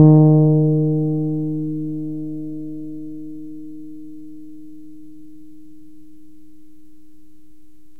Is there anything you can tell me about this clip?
These are all sounds from an electric six string contrabass tuned in fourths from the low A on the piano up, with strings A D G C F Bb recorded using Cool Edit Pro. The lowest string plays the first eight notes, then there are five on each subsequent string until we get to the Bb string, which plays all the rest. I will probably do a set with vibrato and a growlier tone, and maybe a set using all notes on all strings. There is a picture of the bass used in the pack at